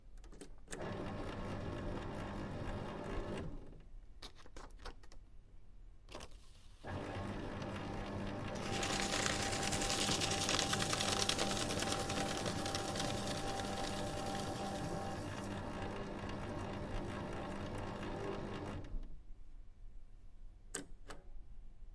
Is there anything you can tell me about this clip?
Documents being shredded by a paper shredder. The documents in question DID NOT contain classified information about the whereabouts of your favorite celebrity. Or did they?